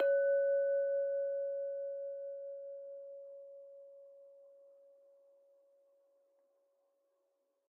Just listen to the beautiful pure sounds of those glasses :3